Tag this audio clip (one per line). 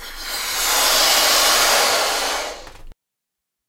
balloon
inflate